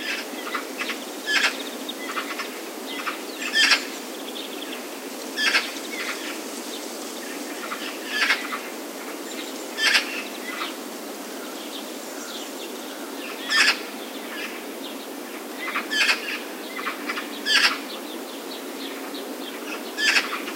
Several magpies calling. Recorded with a Zoom H2.